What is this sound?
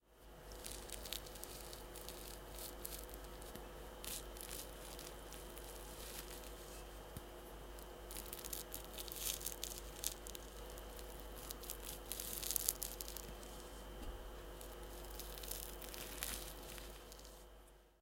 the sound of shaving